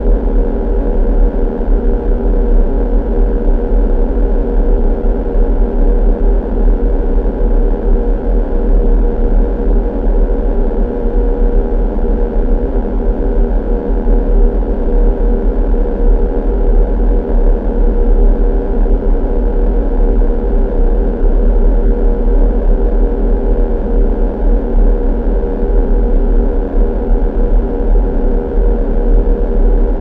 Res LowDrone
Part of assortment of sounds made with my modular synth and effects.